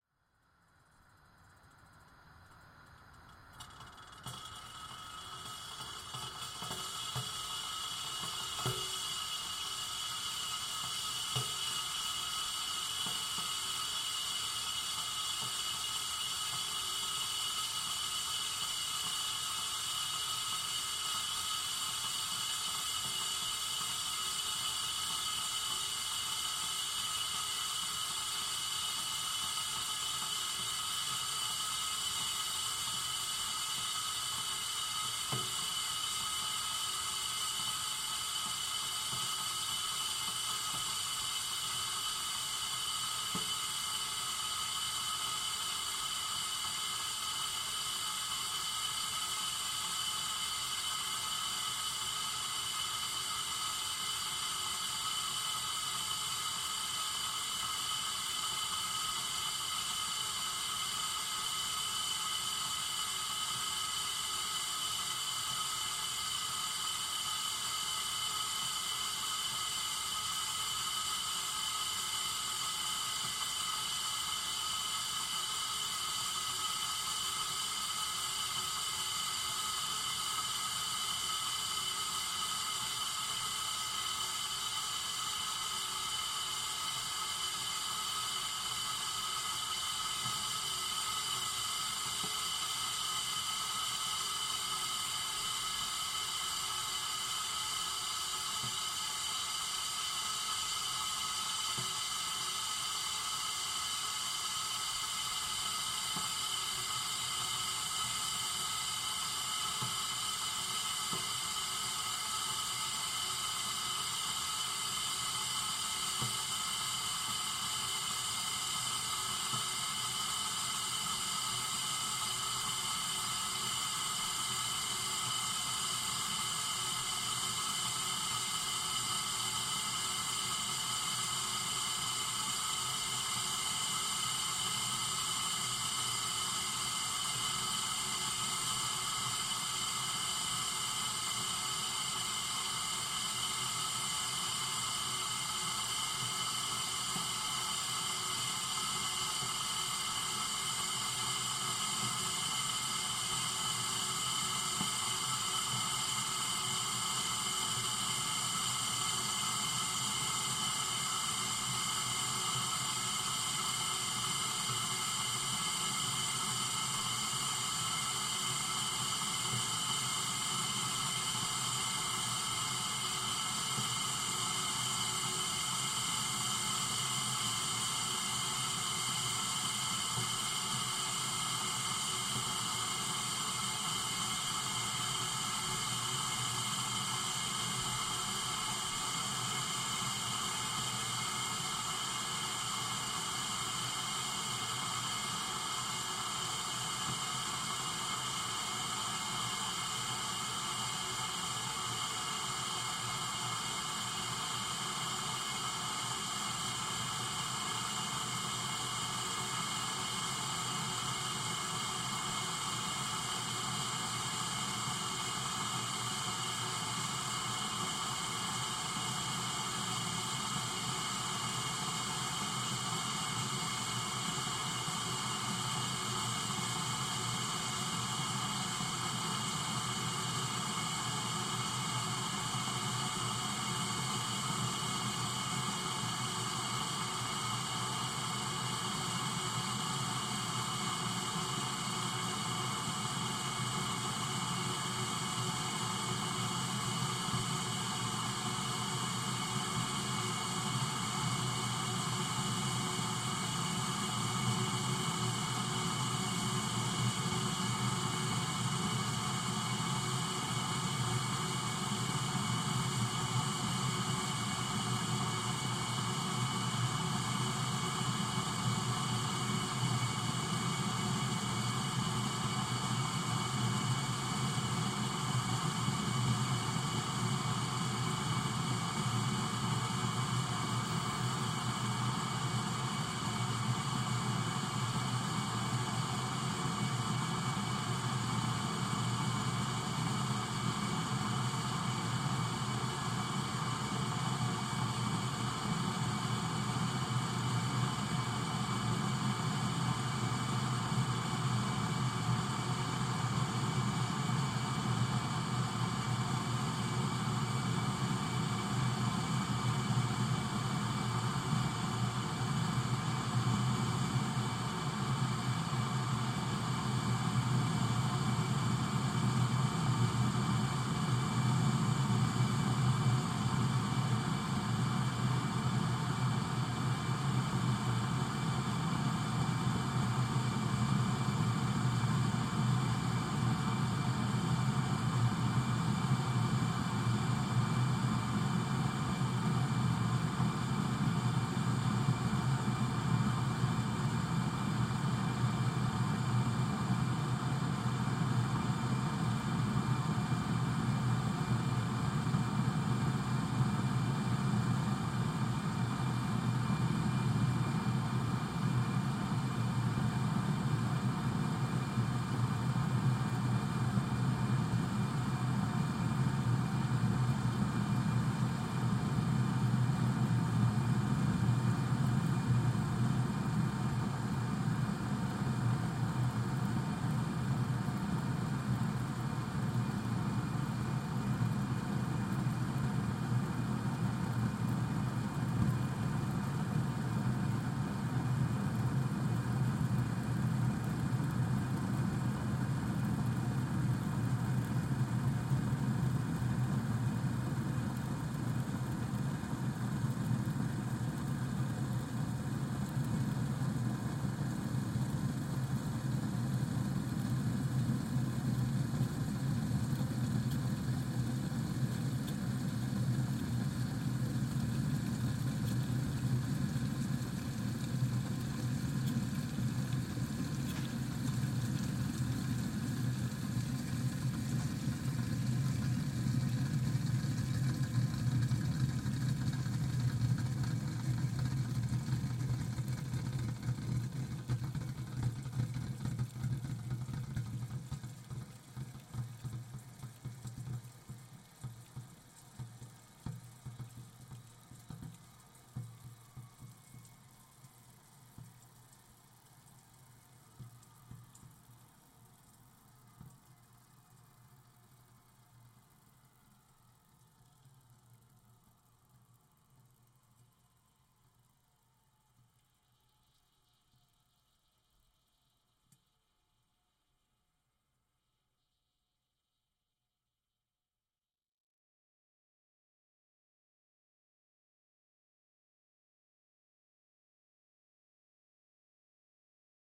Boiling Kettle on Gas
Boiling water on a gas stove.
bubble, slow, cooling-metal, boiling-water, gas, kettle, water, boiling, bubbling, hiss, bubbles